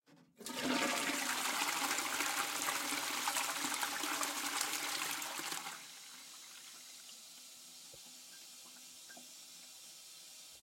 Flushing the toilet. Recorded by ZOOM H6.